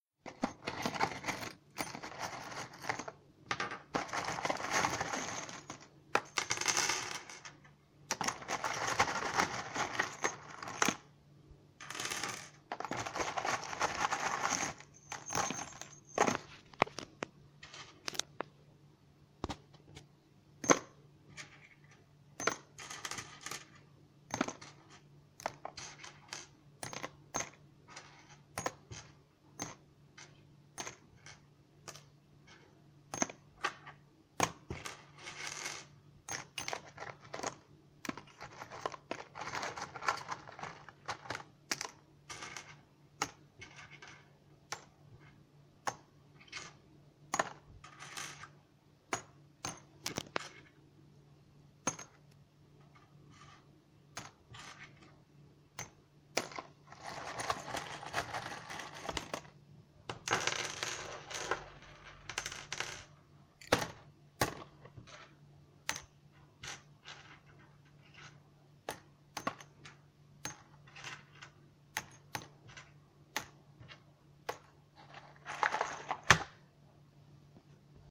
Me searching screws from box.
box, plastic, Screws, searching